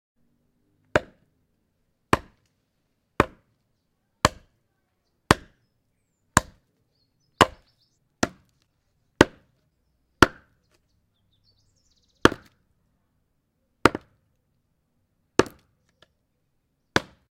chopping wood with axe
The sound of chopping wood outside with a metal axe multiple times at different speeds and intensities
metallic, OWI, spring